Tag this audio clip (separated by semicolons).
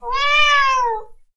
animal; animals; cat; cats; domestic; meow; pet; pets